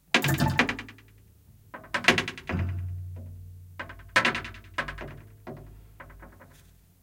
various mysterious noises made with wire iron pieces. Sennheiser MKH60 + MKH30 into Shure FP24, PCM M10 recorder